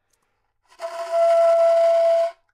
Sax Soprano - E5 - bad-richness bad-timbre
Part of the Good-sounds dataset of monophonic instrumental sounds.
instrument::sax_soprano
note::E
octave::5
midi note::64
good-sounds-id::5860
Intentionally played as an example of bad-richness bad-timbre
E5, good-sounds, multisample, neumann-U87, sax, single-note, soprano